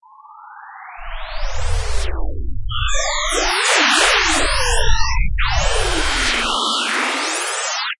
[AudioPaint] spyrographics
abstract, audiopaint, effect, electronic, image, image-to-sound, weird